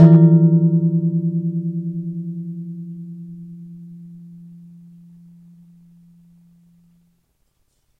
Topf 01 disonant
Strike on massive big kitchen pot (steel) with water dissonant